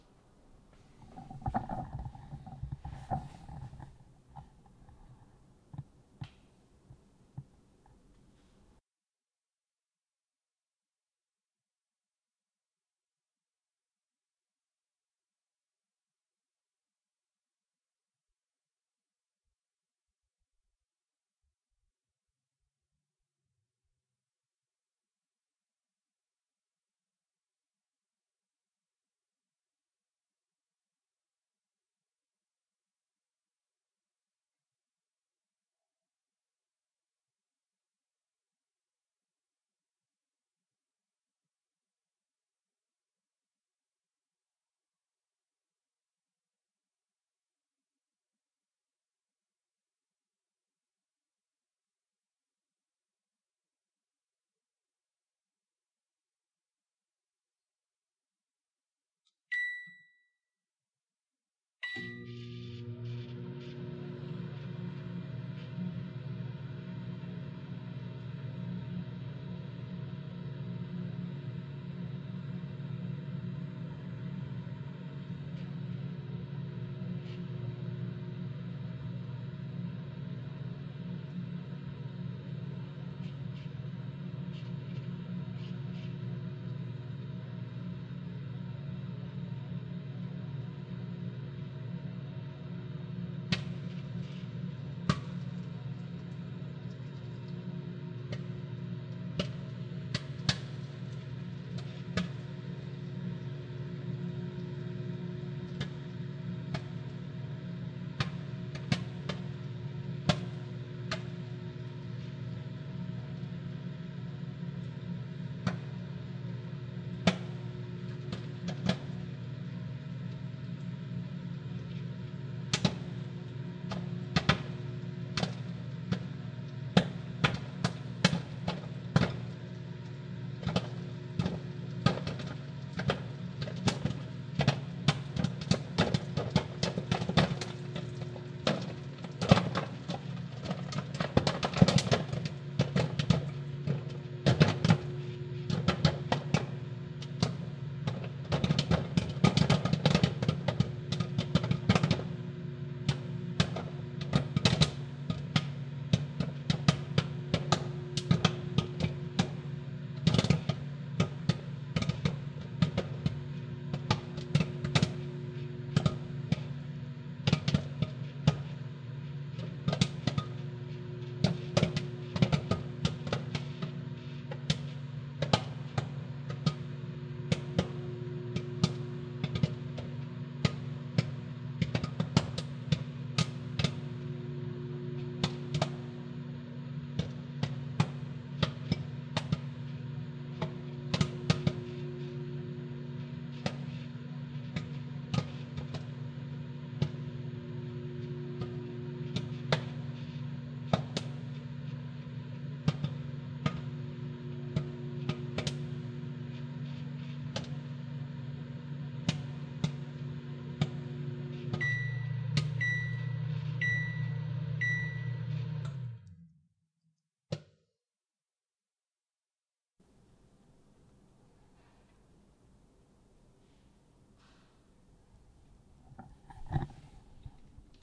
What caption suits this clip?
Full recording or microwave popcorn
butter, kernals, microwave, popcorn